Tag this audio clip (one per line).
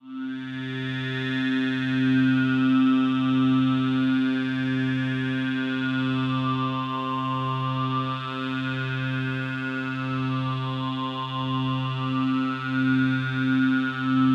collab-1
multi-osc
Reason
synth
thor